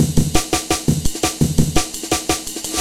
170 amen mangled 5
A mangled Amen breakbeat
amen, bass, dnb, drum, idm, jungle, mangled, processed, winstons